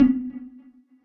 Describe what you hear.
jillys sonar5
Sonar sound made with granulab from a sound from my mangled voices sample pack. Processed with cool edit 96. Pitched down for a plucked effect.
granular, jillys, synthesis